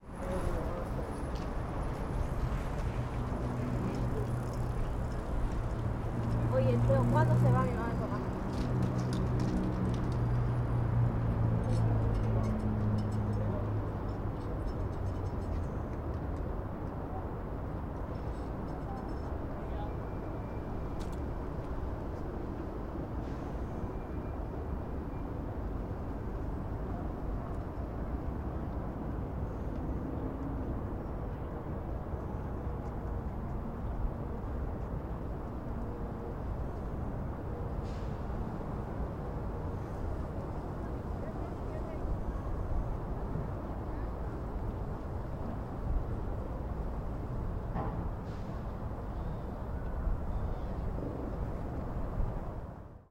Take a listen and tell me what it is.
Ambience City Valencia
Ambience in Pont dels Serrans in Valencia, with light traffic and bikes
ambience, bikes, bridge, light, traffic, valencia